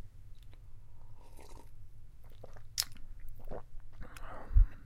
Recording of me taking a sip of a drink. Yum.
Sip, drink, human, liquid, male, slurp, soda, water